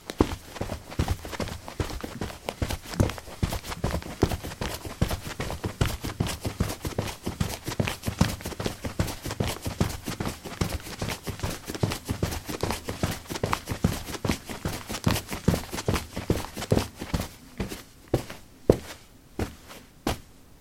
Running on carpet: light shoes. Recorded with a ZOOM H2 in a basement of a house, normalized with Audacity.